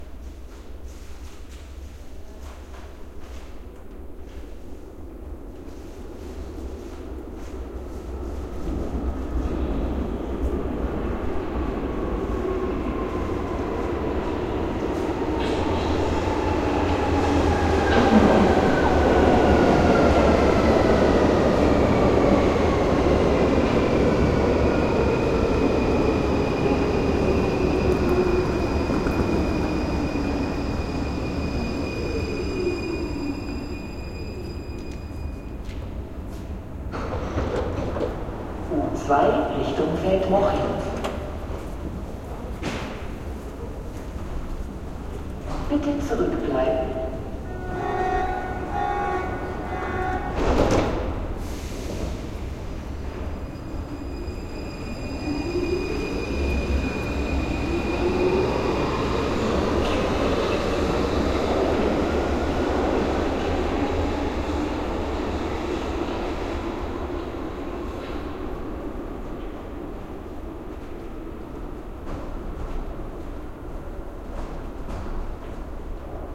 It's the sound of a subway train entering the station "Frankfurter Ring" in Munich. It's a new type, modern subway train. You hear somebody walking towards a door pulling a trolley case. Doors open, announcement comes, doors close, train leaves.